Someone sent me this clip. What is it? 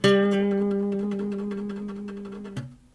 student guitar vibrato G
Vibrato notes struck with a steel pick on an acoustic small scale guitar, recorded direct to laptop with USB microphone.
scale, guitar, acoustic, vibrato, small